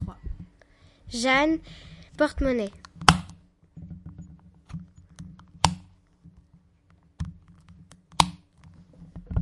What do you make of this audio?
Jeanne-porte-monnaie
France, mysound, saint-guinoux